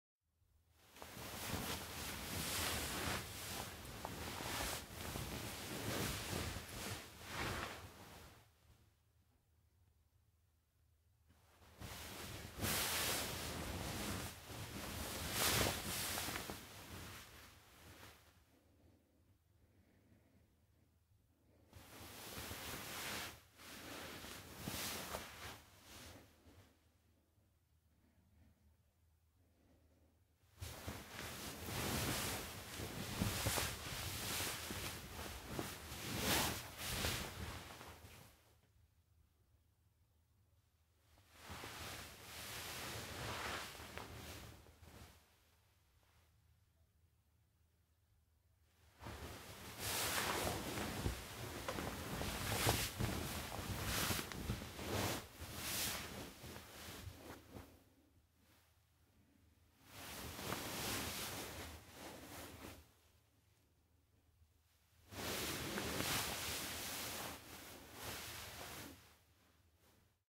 turning in bed
turning & tossing in bed (Foley). Multiple versions
CAD M179 >ULN-2
bed, bed-sheet, fabric, Foley, sheet, tossing, turning